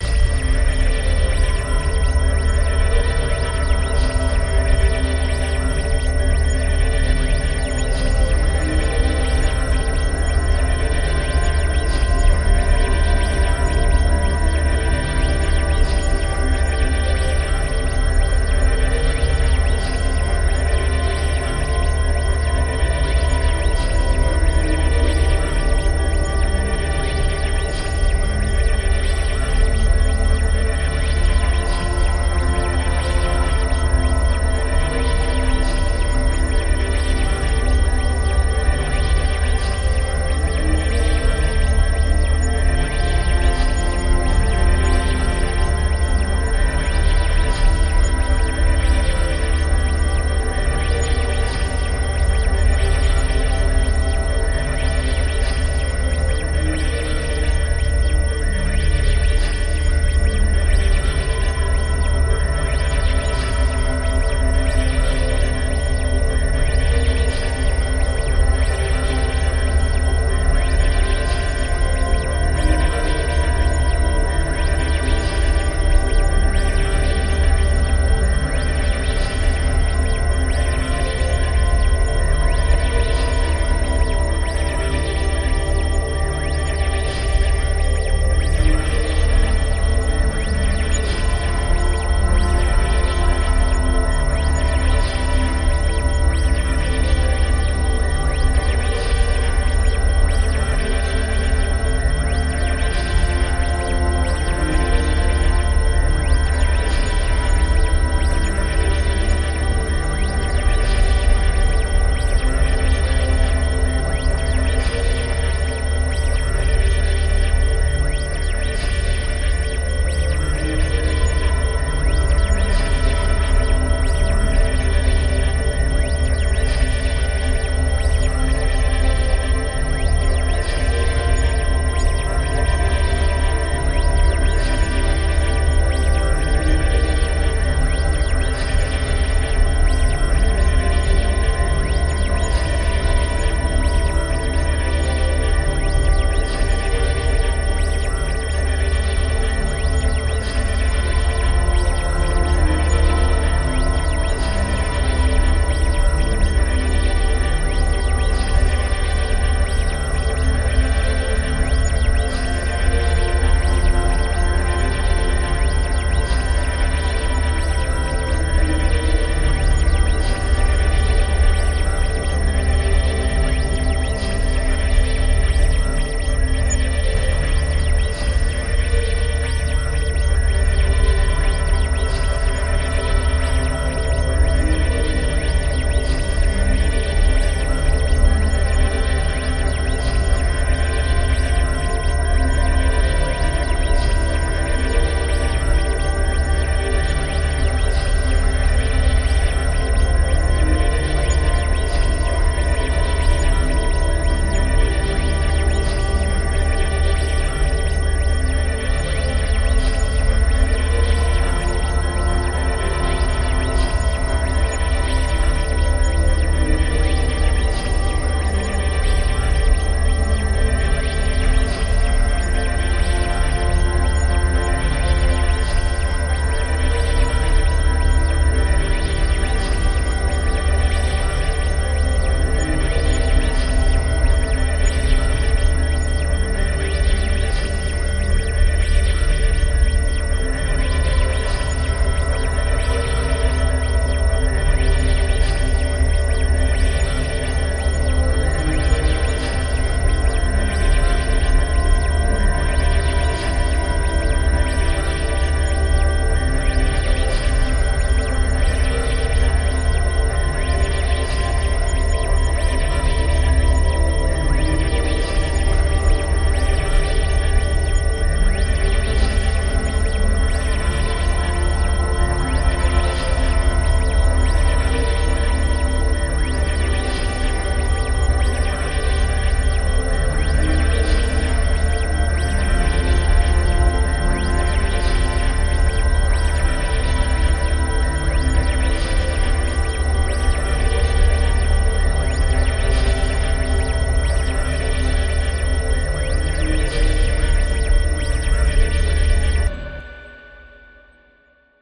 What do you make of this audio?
A soundscape for a spaceship or outer-space film/video. Very fun and cheesy futuristic spaceship sounds with 80's synth backing.
aliens, analog, commnication, computing, fiction, film, funny, future, futuristic, info, lab, Machine, Machinery, movie, oldschool, retro, science, sci-fi, scoring, signal, soundesign, soundtrack, space, spaceship, Strange, synth, synthesizer, Synthetic, Weird